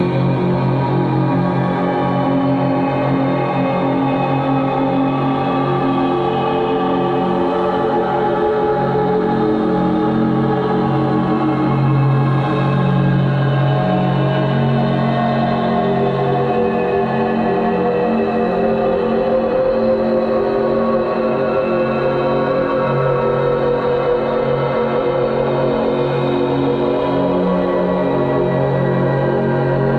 Reels1-NoisyAmbiance
ambient, space, drone, noise, pad